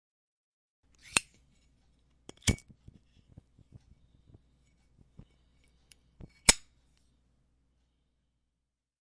Zippo open - light - close
The sound of a Zippo lighter as it opens, lights, and closes in a realistic time frame for lighting a cigarette.
cigarette, Zippo